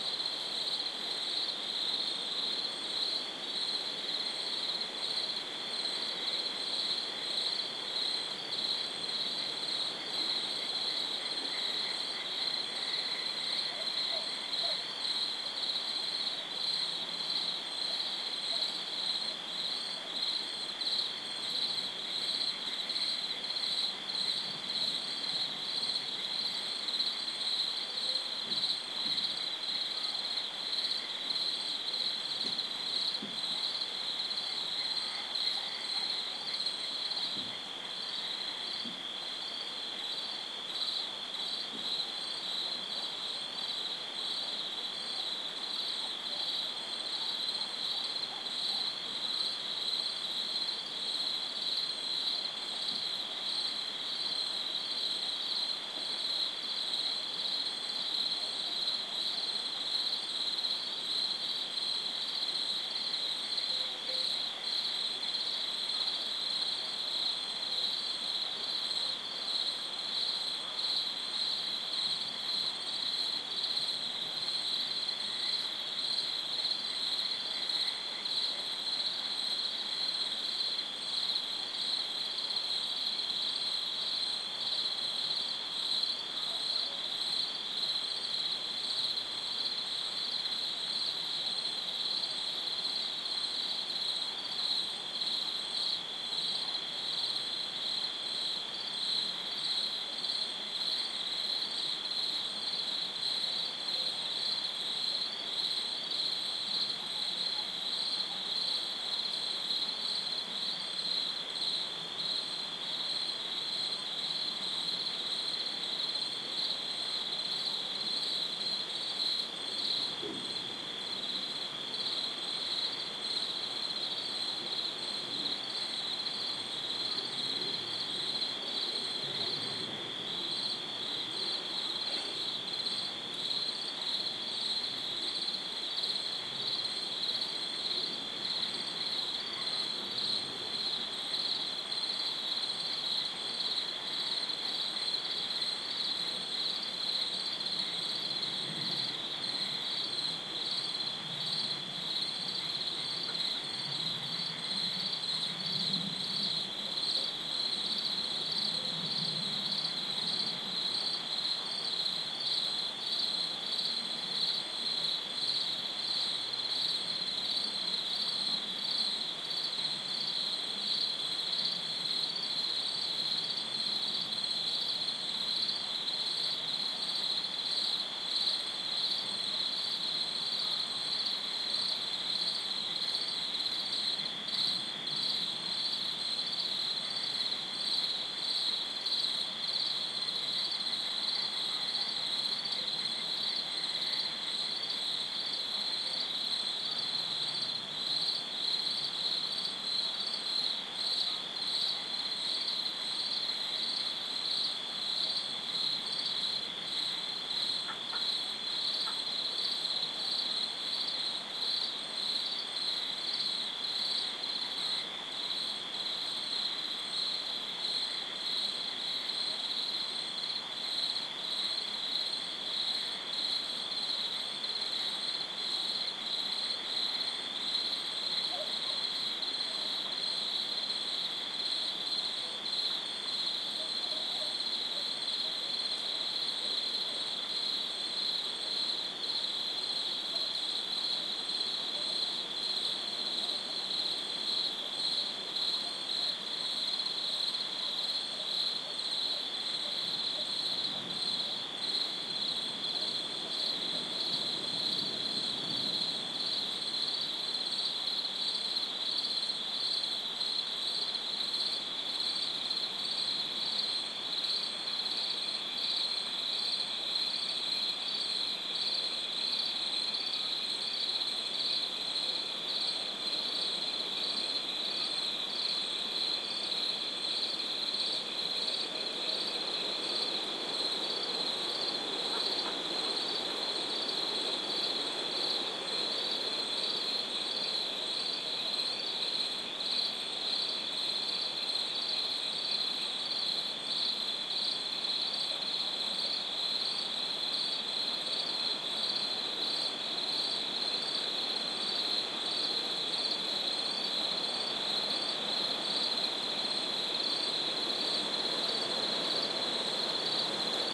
20160415 night.calm.12
Cricket chorus, some frog calls can also be heard. Audiotechnica BP4025, Shure FP24 preamp, PCM-M10 recorder. Recorded near La Macera (Valencia de Alcantara, Caceres, Spain)
calm, crickets, field-recording, insects, nature, night, peaceful, spring